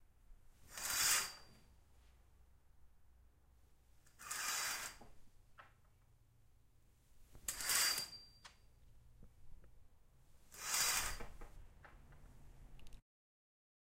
pulling curtain
pulling a curtain
close, curtain, open, OWI, pulling, room